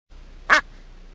me quacking like a duck